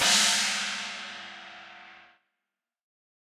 crash - china cymbal 1
Istanbul 18" Agop Signature China Cymbal (AGCH18-1012815P),
Audix Fusion F15 Cymbal Condenser Mic